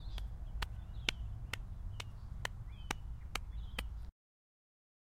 patting rock
Large boulder getting pet: Shallow and fast pats at a constant rhythm. Can be used as foley for slaps and pats to walls or other soild stone structures. Recorded with a zoom H6 recorder/ microphone on stereo. Recorded in South Africa Centurion Southdowns estate. This was recorded for my college sound assignment. Many of my sounds involve nature.
hit, pat, rocks, smack, slap, OWI, wall, hand, impact